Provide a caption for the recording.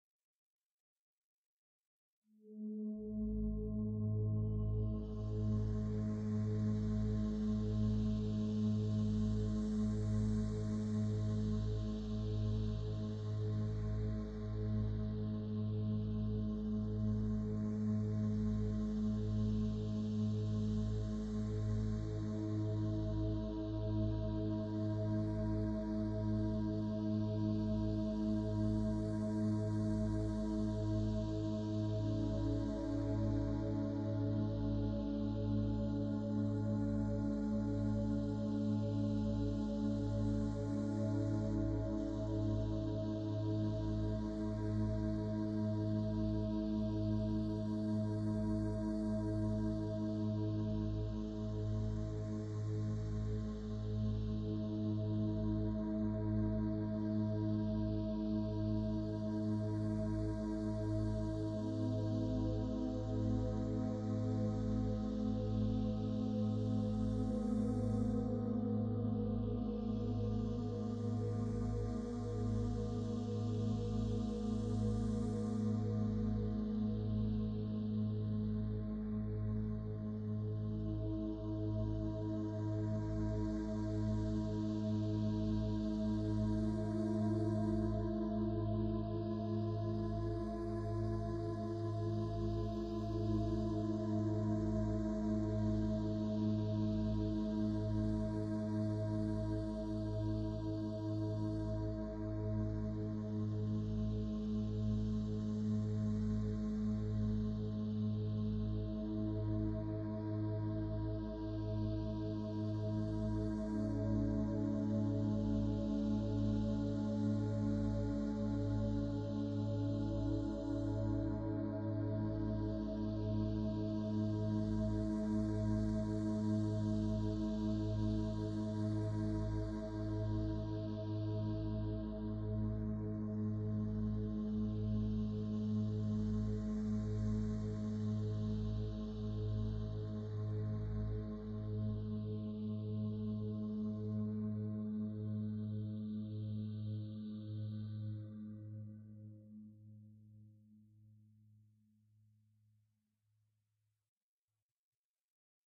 Relaxation Music for multiple purposes. Created with a synthesizer, recorded with MagiX studio. Edited with audacity and MagiX studio.